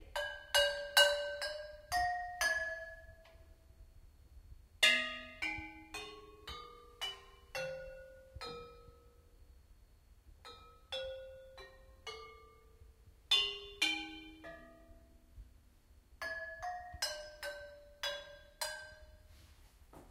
Child plays chimes / bells
A child plays some chimes or bells.
bells, child, chimes, music, playing